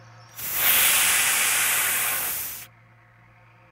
blowing through a trumpet with an open spit valve.